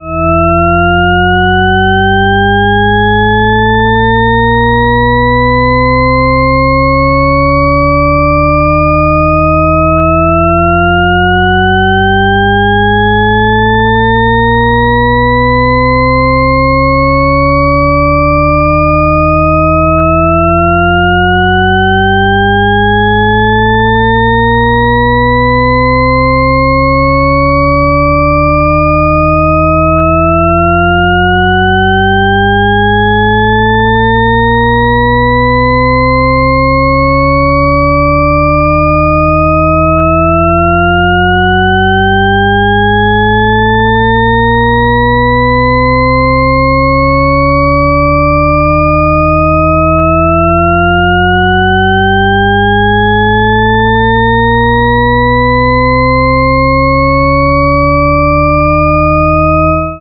Rising Shepard tone 60sec (take 2)
This is a smoothly rising Shepard tone that I created using Adobe Audition 3's tone generator. I generated the tone as sine waves in 7 different octaves and mixed the results together. The period of repetition is 10 seconds.
(This is a second version in which I removed the phase variance, making the sound monophonic for all practical purposes).
mono, phase, rising, shepard, sine, sine-wave, tone